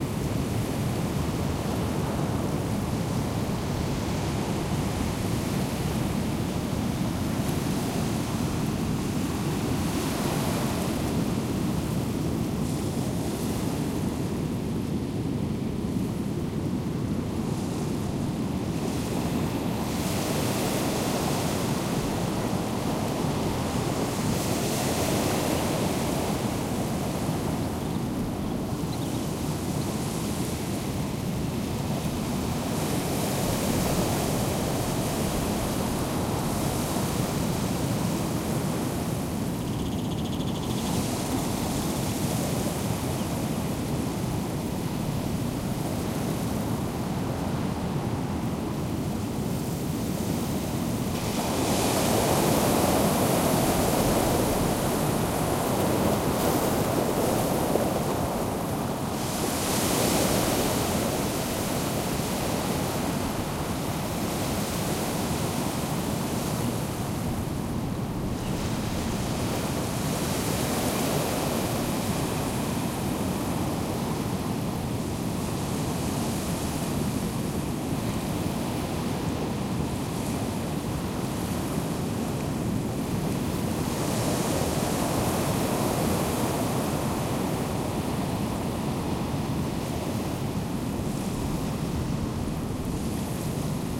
Indian Ocean - distant rumble
Recorded at Kenya, Mombasa, Shanzu Beach on a windy day in July 2012. Sound of waves in a medium strength breeze and occasional bird voices. XY stereo recording with mics placed around 100 meters from the water.
beach, breeze, coast, field-recording, ocean, rumble, sea, seaside, shore, water, wave, waves, wind